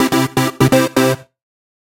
Jingle Achievement 00
An 8-bit winning jingle sound to be used in old school games. Useful for when finishing levels, big power ups and completing achievements.
Old-School, 8bit, Video-Game, 8-bit, SFX, Game, Jingle